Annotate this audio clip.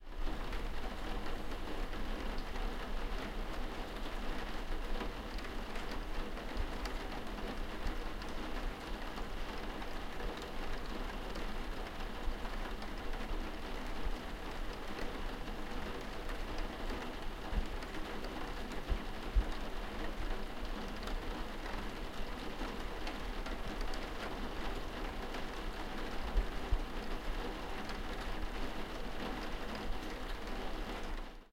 Rain on Windows, Interior, A
Raw audio of rain hitting a skylight window from inside the house.
An example of how you might credit is by putting this in the description/credits:
The sound was recorded using a "H1 Zoom recorder" on 11th May 2016.